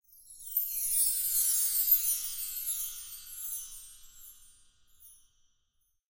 My friend, held the Meinl CH27 Chimes in the air. I then used a drum stick and slowly ran it through the chimes creating a nice clean chime transition sound.